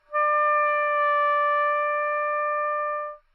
Oboe - D5 - bad-pitch-stability
Part of the Good-sounds dataset of monophonic instrumental sounds.
instrument::oboe
note::D
octave::5
midi note::62
good-sounds-id::8190
Intentionally played as an example of bad-pitch-stability
good-sounds, neumann-U87, oboe, single-note, multisample, D5